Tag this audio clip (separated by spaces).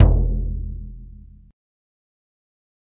drum oneshot percussion